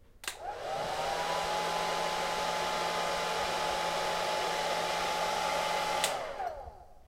dryer short 1
This sound is part of the sound creation that has to be done in the subject Sound Creation Lab in Pompeu Fabra university. It consists on the sound of a hairdryer of a man that is drying his hair.
dryer, swoosh, hairdryer, air, wind, upf, UPF-CS14